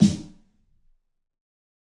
Fat Snare EASY 023
This is The Fat Snare of God expanded, improved, and played with rubber sticks. there are more softer hits, for a better feeling at fills.
fat, drum, snare, realistic, god, kit, sticks